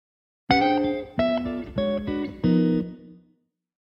Jazz guitar #1 109bpm
some descending augmented chords played on guitar
chords, guitar, jazz